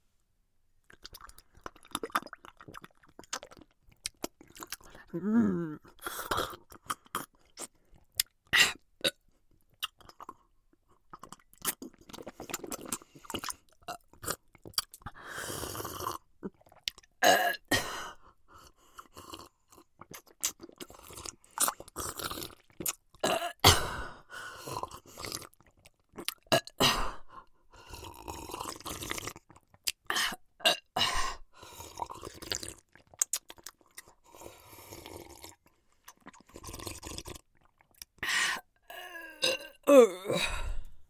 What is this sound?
weird blood drinking
A strange combination of slurps, sips, loud drinking, and burping that I used in an episode of a comedy podcast where a vampire drank a bunch of blood.
burp, burping, drink, drinking, sip, sipping, slurping, straw, vampire